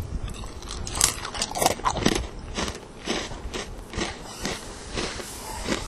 Munching Snack
The sound of yours truly
feasting on "the snack
that smiles back."